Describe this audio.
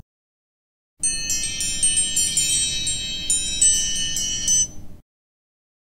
Altar Chimes(Ringtone)
Chimes, Ringtone, Wind